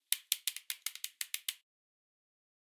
unlock box
box, unlock, unlocking